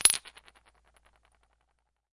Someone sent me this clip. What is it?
Dropping a small piece of broken pottery onto a concrete basement floor.